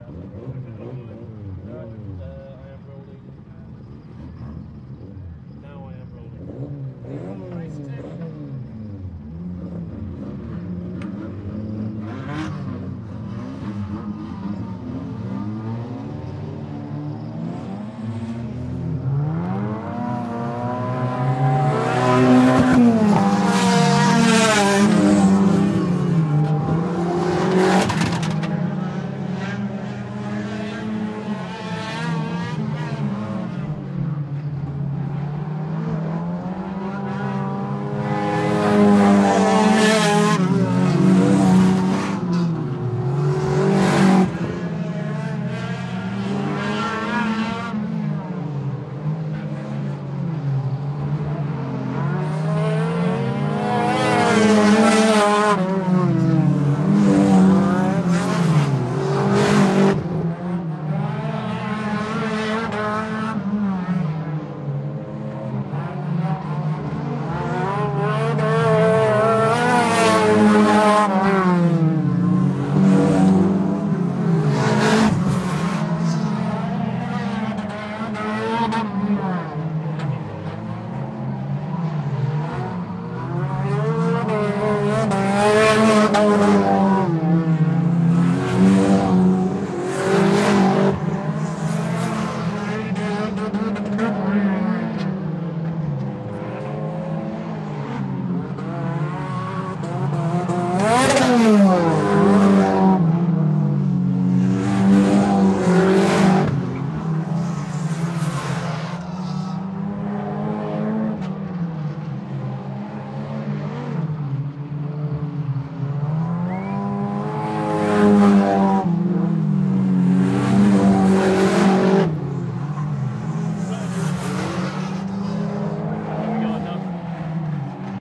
Raceway Cars Racing 2 - Great drive-bys
raceway, engines, driving, engine, rev, racing, cars, revving, speedway, drive, motor